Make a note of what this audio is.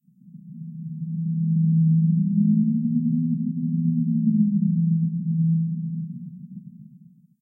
Glass beer bottle blow sounding like the background "whoo" effect in the movies Alien and Blade Runner.
MONO
Old school spot effect. Helmholtz resonance using a partially-filled (for pitch tuning) glass beer bottle. The resulting tone was sampled, bandpass filtered, pitch bent and laid over a copy of the original sample. Reverb added post-effect to push the sound back and smooth out some nasty quantizing artefacts caused by the pitch bender.
Alien, bottle-blow, effect, fx, riser, whoo